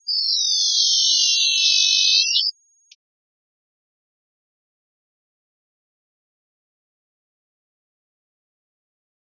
This sound will generate the grinning meme troll face in an audio spectrogram. Nice little Easter egg.
image-synthesis, face, troll, Meme